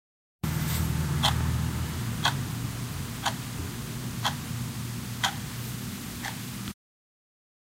Sonido de reloj